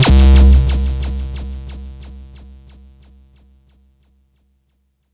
TBBASS C 90bpm
TB303 Bass hit with delay
delay, TB303